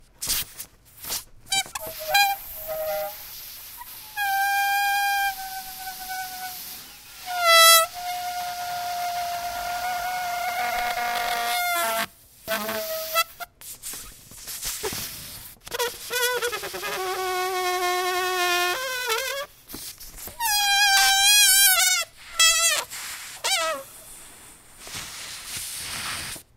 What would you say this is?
Weird Balloon Sounds
Letting out air out of a balloon. It screams and whines but that's how things are going to be.
vibration, blow, rubber, fly, air, away, balloon-flying, air-flow, balloon, fart, empty, flying-away, blowing, flying, zoom-h2